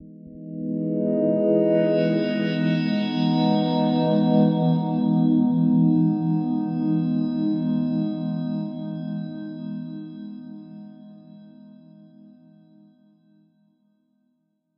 Crystal Mirrors - 3
A luscious pad/atmosphere perfect for use in soundtrack/scoring, chillwave, liquid funk, dnb, house/progressive, breakbeats, trance, rnb, indie, synthpop, electro, ambient, IDM, downtempo etc.
130, 130-bpm, ambience, atmosphere, dreamy, effects, evolving, expansive, house, liquid, long, luscious, melodic, morphing, pad, progressive, reverb, soundscape, wide